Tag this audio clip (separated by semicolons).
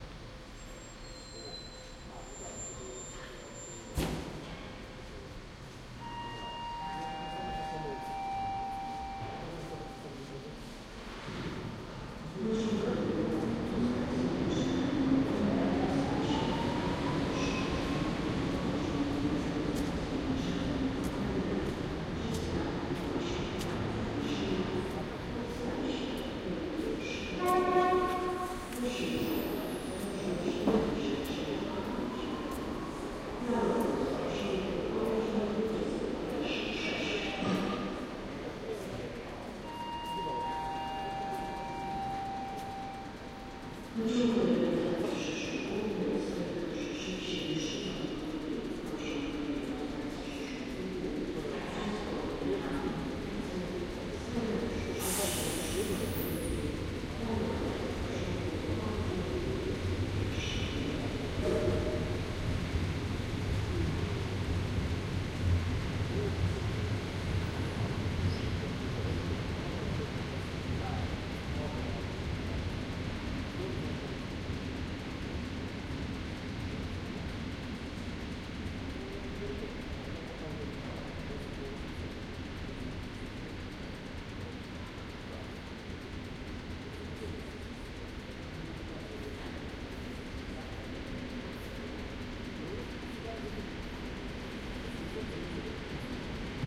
railway; train; station; railroad; trains; railway-station; rail